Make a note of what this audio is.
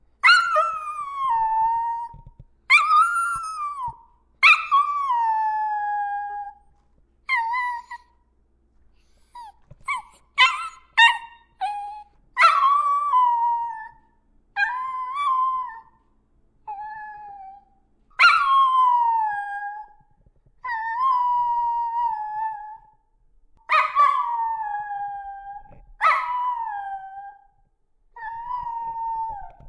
Howling for the microphone.
Created using an HDR sound recorder from MSU.
Recorded 2014-09-13.
Edited using Audacity.